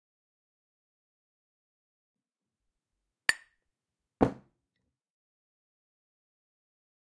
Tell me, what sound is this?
tapping with beer 3
Tapping with two glasses of beers.
Czech, Panska, PanskaCZ